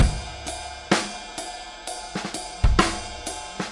trip hop acoustic drum loop